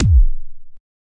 kick sample made with renoise
bass, bd, drum, kick, renoise, synth